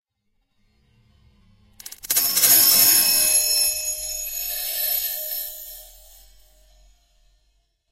glass bottle dropped (slowed down)
the sound of a glass clunk in slow motion and higher pitch
distorted, glass, slow